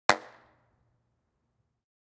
Tunnel 3 Impulse-Response crisp flutter echo
3, crisp, echo, flutter, Impulse-Response, Tunnel